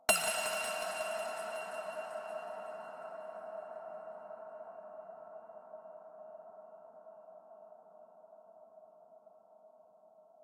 a digital bleep with reverb
reverb, bleep